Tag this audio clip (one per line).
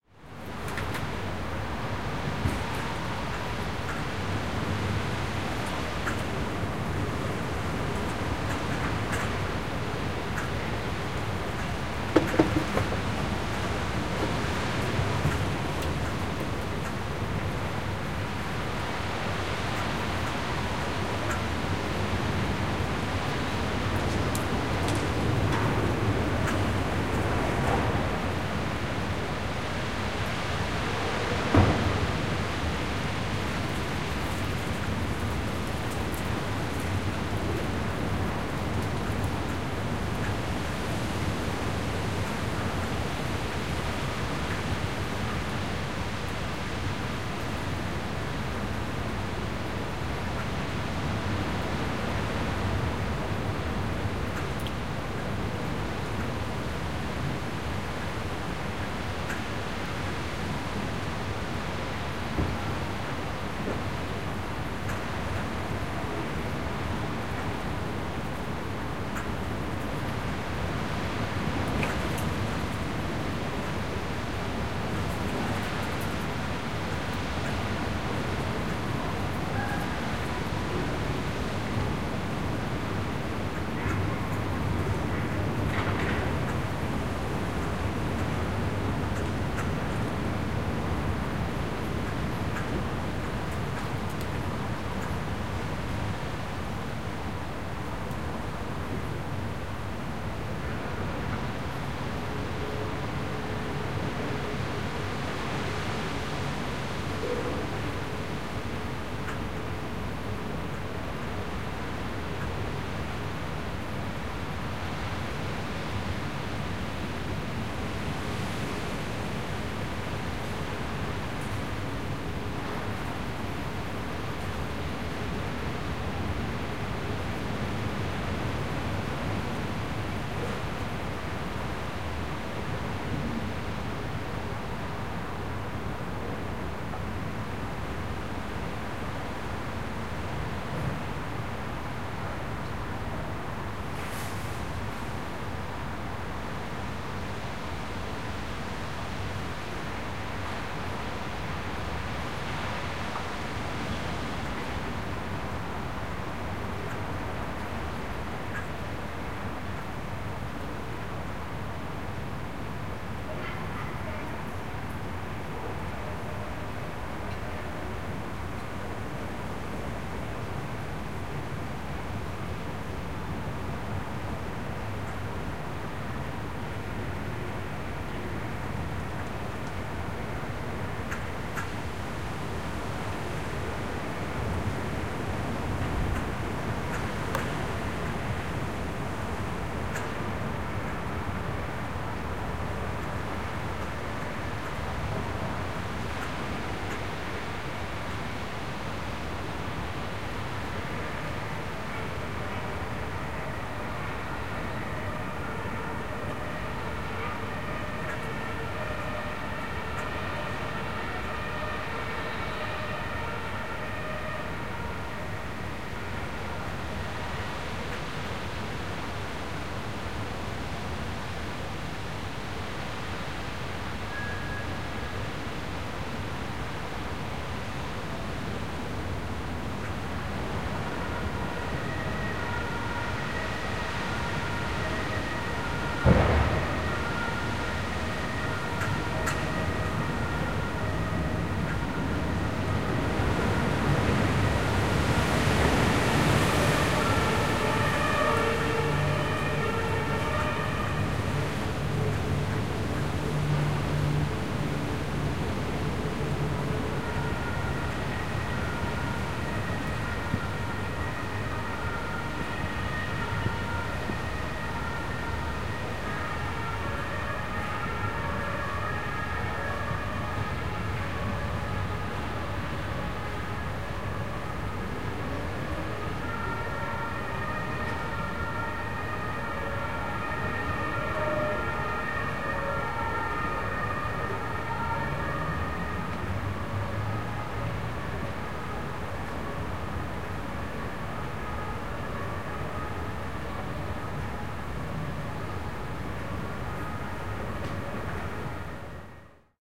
town
hinterhof
yard
soft
citysounds
fire
fireservices
stadtgeraeusche
field
brigade
berliner
recording
feuerwehr
neighborhood
windig
wind
backyard